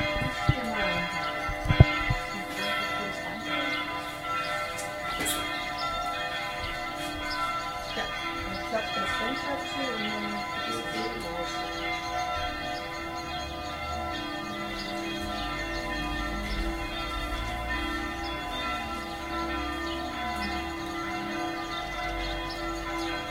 Church bell in dransfeld, germany
church is ringing in germany